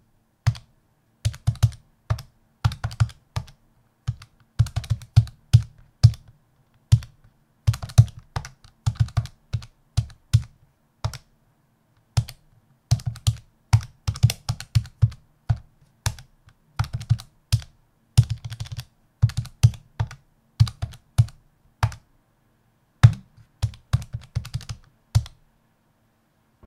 Calculator typing sounds recorded with a Rode Procaster Mic.
maths, accounting, office, calculator, finance, numbers